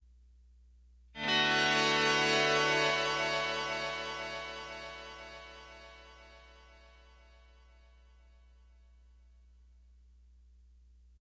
Ringing D6 Chord
A heavily processed D6 chord played on a Stratocaster with noiseless pickups and processed through a DigiTech GSP 2101 ProArtist tube processor at 11:45PM here in Collingswood, NJ, USA.
chord, drone, guitar